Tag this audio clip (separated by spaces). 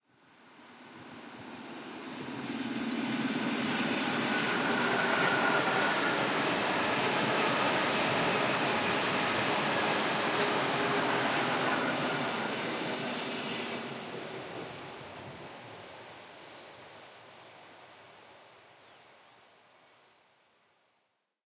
Electric electric-train express locomotive passenger-train rail rail-road rail-way railway traffic train transport transportation